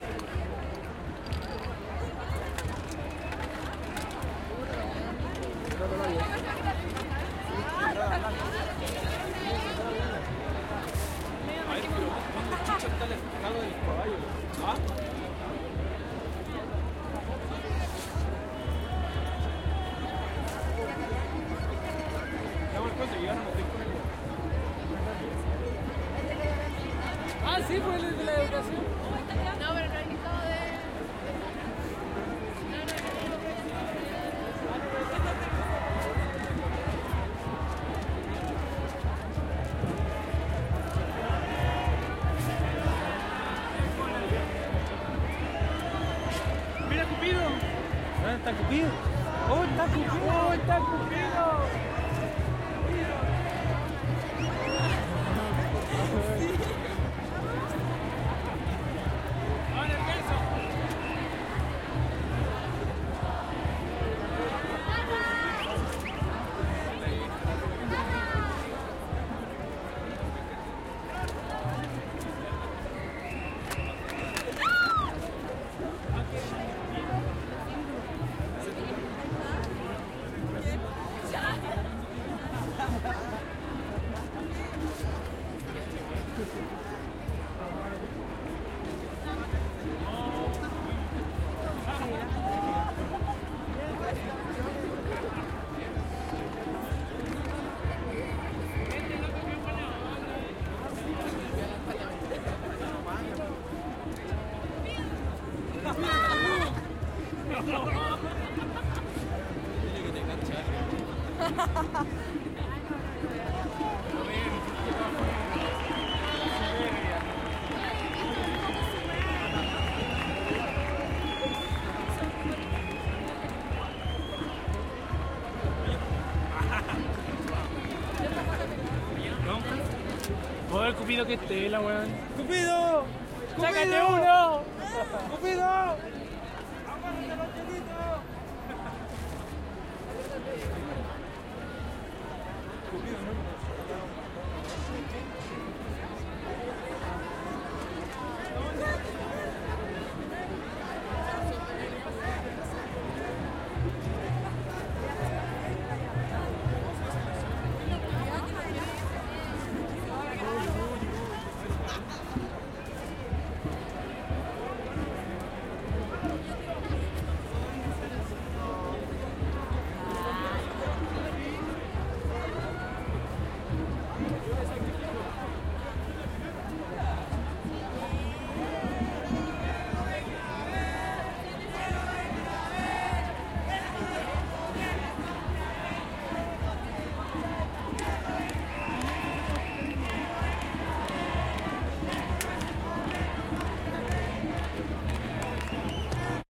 Besatón por la educación chilena, Plaza de Armas, Santiago de Chile, 6 de Julio 2011.
Buscando a Cupido entre la multitud.